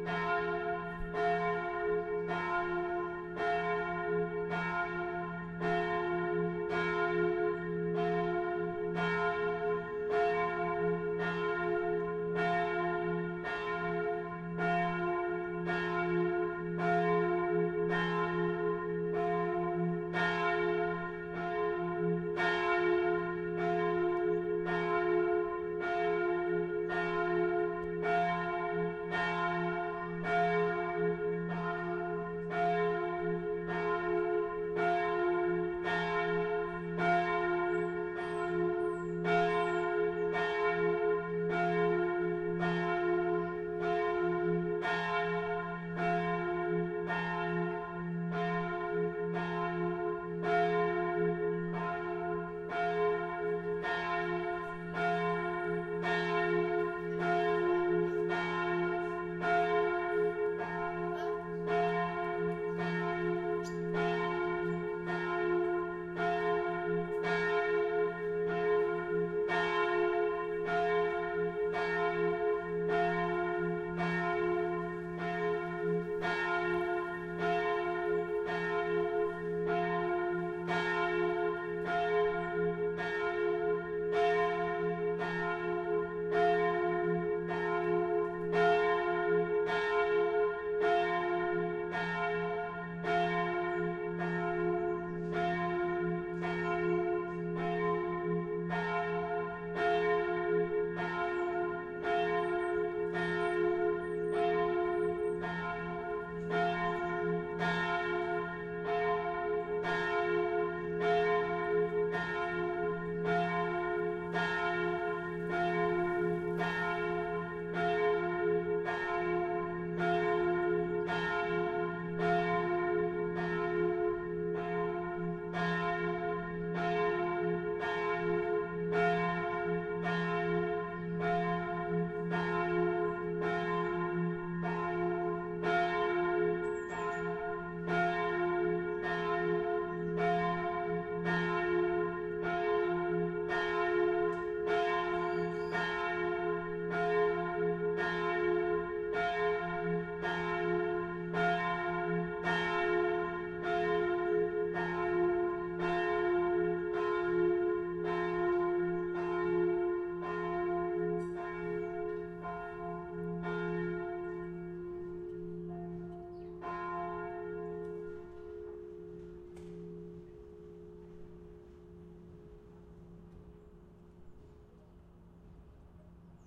Church Bell
Its a recording from a church ringing at 12 o'clock.
bell, bells, cathedral, church, church-bell, dong, ringing